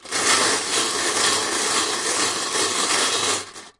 Swirling glass mancala pieces around in their metal container.
swirl, glass, clatter, metal, game, mancala